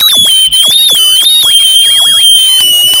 Mute Synth Fake Shortwave 009
Fake short-wave radio interference produced by the Mute-Synth
fake-shortwave, interference, Mute-Synth, radio, short-wave